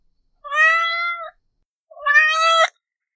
Cat Meow
My friend's cat Robert meows a lot and I was able to catch some on a recording.
Recorded in Columbia, MD
Recorded With ZOOM H6
Microphone: AT8035
16 Bit